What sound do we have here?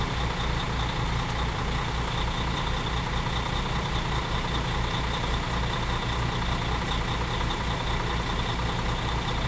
bus engine
motor of a stopped bus
bus, engine, vehicle